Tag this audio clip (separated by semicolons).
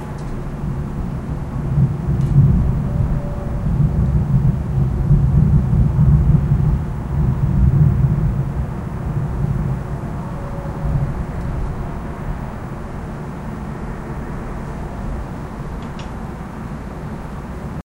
atmosphere; patio